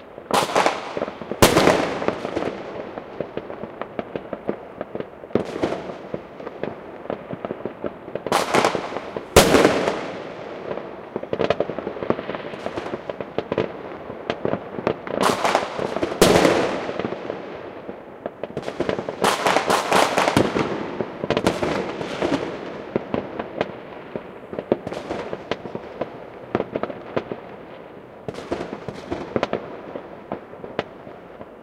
Recorded with the zoom recording device on New Year's Eve 01.01.2019 in Hessen / Germany. A firework in a small town.
cinematic, drone, strikes, New-Years-Eve, boom, film, dark, soundscape, dramatic, bangers, ambient, suspense, movie, horror, background-sound, atmosphere, Rockets, ambience, firework, shot, mood, blasts, background, cannon, guns, hollywood